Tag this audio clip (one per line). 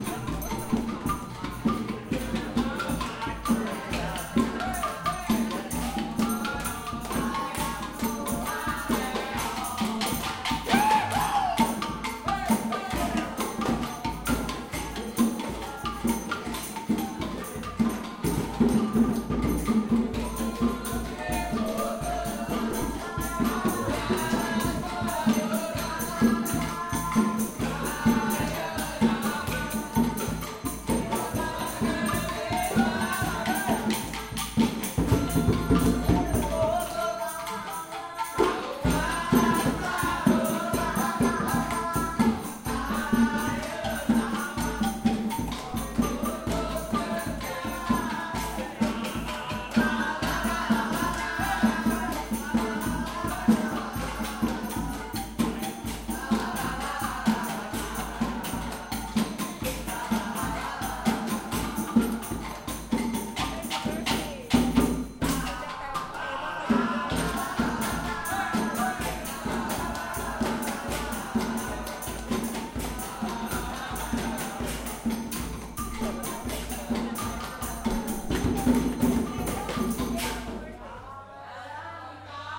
percussion
indonesia
singing
birthday
sundanese